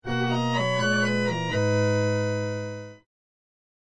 Fanfare 4 - Rpg
Sombre fanfare for an rpg game.
Created in Milkytracker.
This sound, as well as everything else I have uploaded here,
is completely free for anyone to use.
You may use this in ANY project, whether it be
commercial, or not.
although that would be appreciated.
You may use any of my sounds however you please.
I hope they are useful.